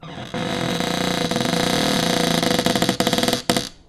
One in a series of some creaks from my cupboard doors. Recorded with an AT4021 mic into a modified Marantz PMD661 and edited with Reason.